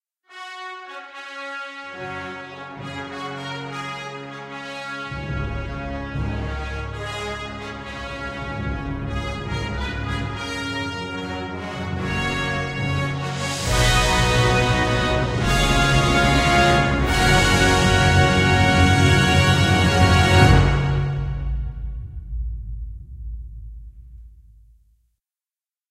superhero fanfare
Orchestral fanfare for superhero scenes or victory scenes, made in a DAW with Caspian Brass, Symphobia and True Strike sample libraries.
symphony, powerful, fanfare, orchestra, victory, trumpets, music, superhero, celebration